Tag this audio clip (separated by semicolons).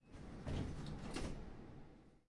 Panska; Door-close; Pansk; Tram; Czech; CZ